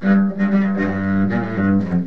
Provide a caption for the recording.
This recording is one bar of a simple rhythmic ostinato in G minor preformed on my cello. It is preformed arco (meaning with the bow) and is approximately 112 beats per minute.
It was recorded into an AC'97 soundcard with a generic microphone.
1-bar, arco, bowed, cello, g-minor, ostinato, rhythmic, string